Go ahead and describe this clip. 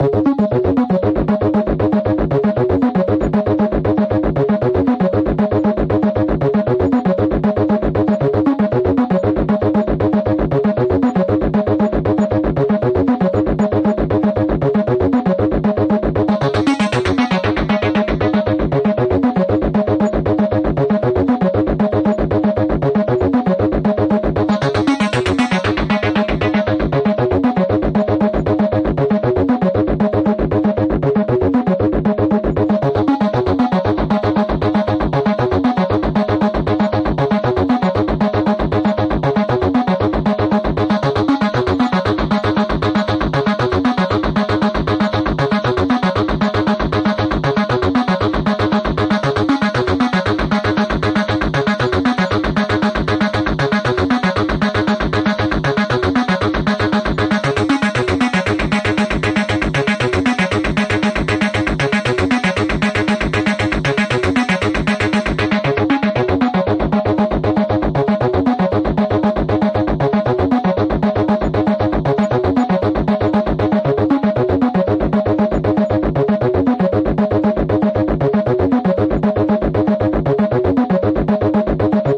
acid soup
Just a synth with a few effects and filters